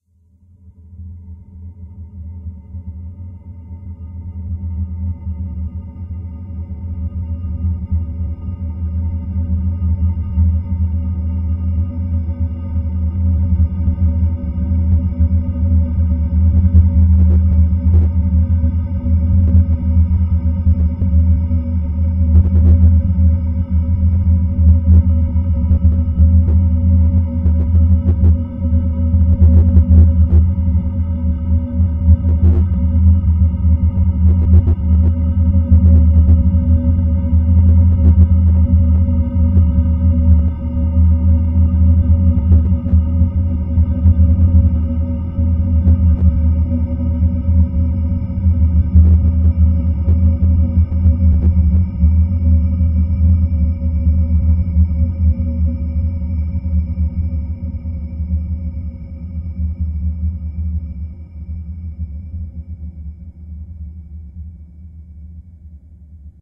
Atmospheric sound for any horror movie or soundtrack.
Atmosphere, Evil, Freaky, Halloween, Horror, Scary, Terror